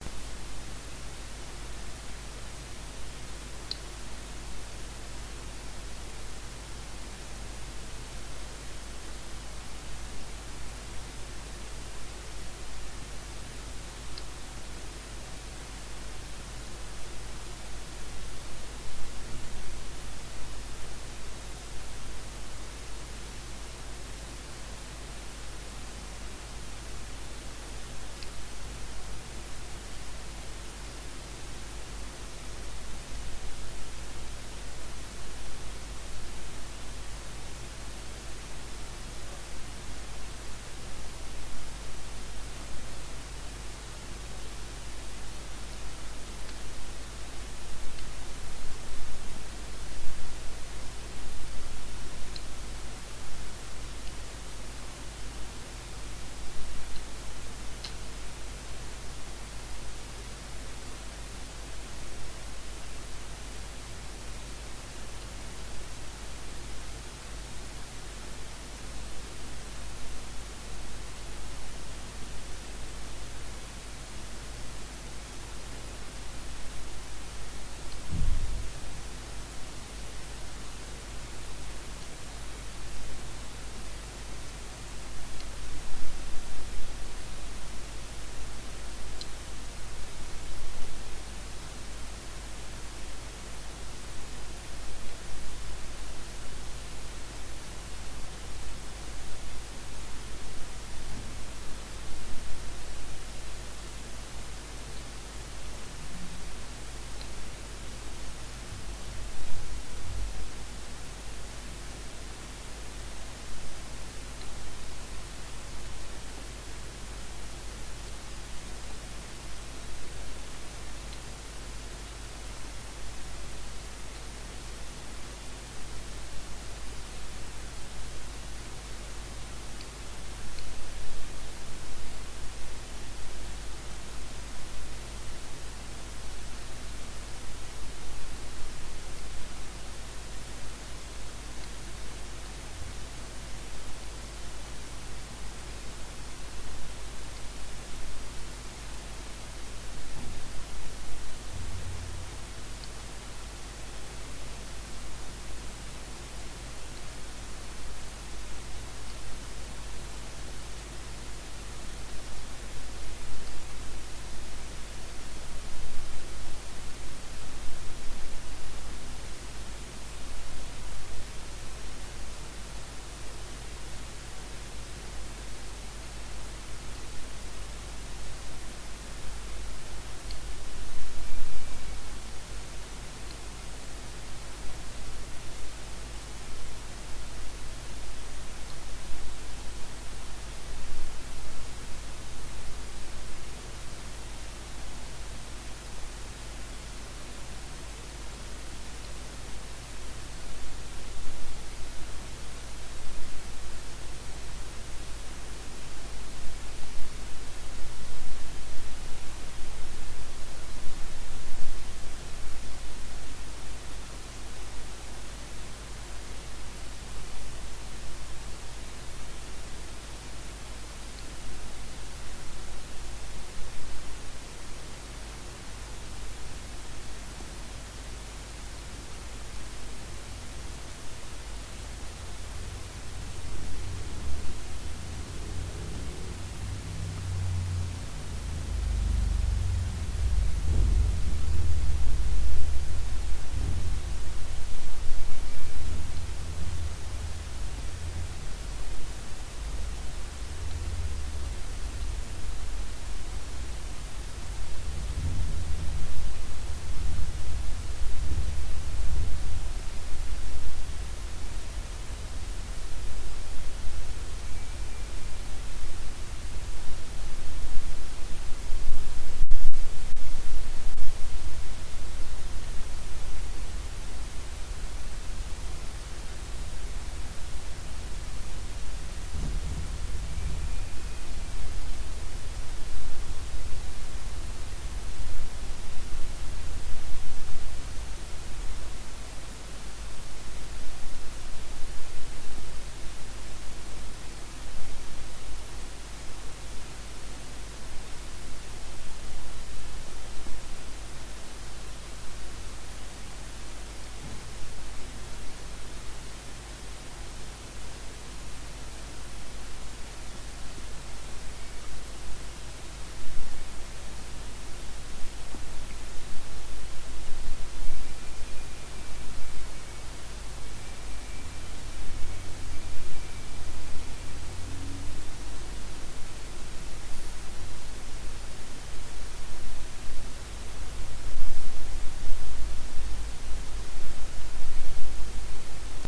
ECU-(A-XX)106
Engine Control Unit UTV ATV Trail Running Type Wipeout Iso Synchronous Fraser Lens Dual Carb Battery Jitter Power Wavelength Time Slot Keep Secure Mapping Navigator Analogic Calculator Trajectory Gravity Freefall